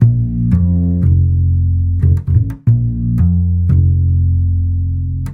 Jazz Bass A 7
jazz, music, jazzy
jazzy, music